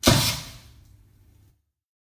Air Explosion
Small explosion made with Compressed Air!